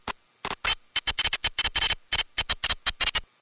Speak and Read Hit 12
Th's
is a speak and read, you will probably come across 1000 of them in your
life time. When i put my patchbay on the speak and math I'll upload
more.....